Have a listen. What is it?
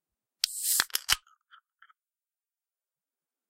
soda can pssh
Opening a can of coke with ringpull.
can, coke, drink, open, opening, pull, ring, soda